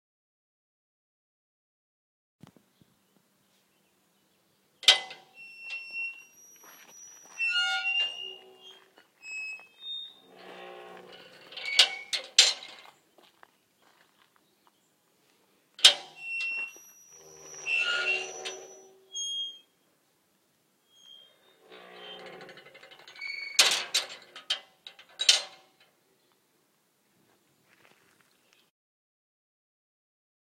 metal gate - rattle handle, swing, clang
A metal gate opening and closing. The handle rattles and the gate creaks as it swings open and then shut. It clangs shut and the handle is returned to its position.
Recorded at Wallington (National Trust property), near Rothbury, Northumberland, UK, on iPhone as video.
metal, rattle, open, swing, garden, close